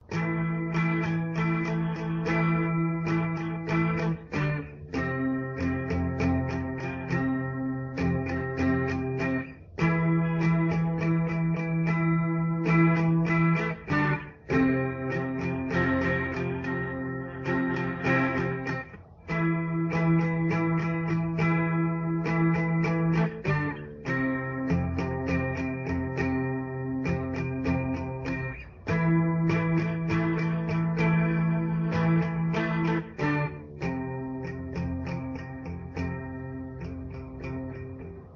I am creator of this piece. Me playing on my Gibson Les Paul electric guitar. I made this so it can be looped and played repeatedly.
electric-guitar, free, guitar, music, original-music, streaming, video-game, video-music